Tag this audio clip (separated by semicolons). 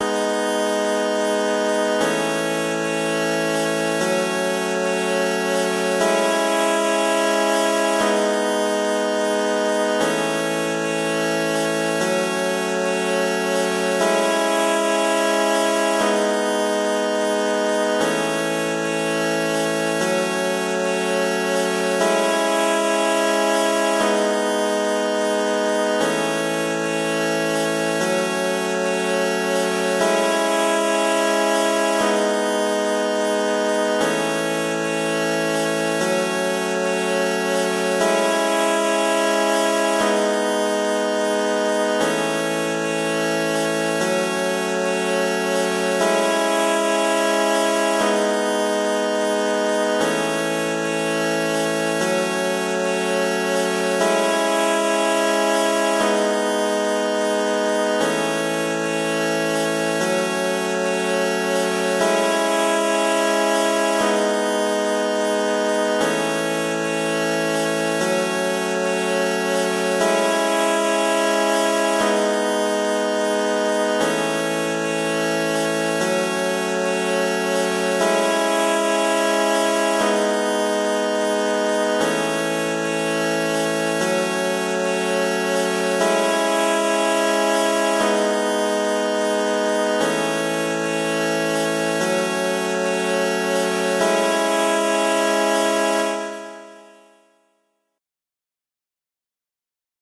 120; 120bpm; bpm; kazoo; loop; synth; synthetyzer